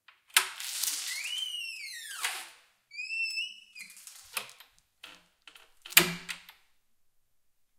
Opening and closing a door